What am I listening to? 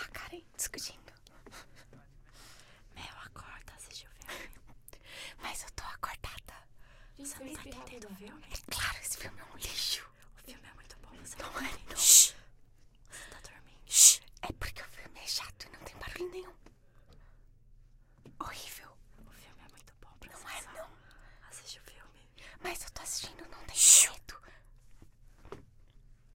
Áudio do som feito por uma pessoa ao pedir silêncio para outras que estavam cochichando, gravado pelo microfone "Neumann TLM 103 (condensador cardióide)" para a disciplina de Captação e Edição de Áudio do curso Rádio, TV e Internet, Universidade Anhembi Morumbi. São Paulo-SP. Brasil.
shut-up, silence, mute, silent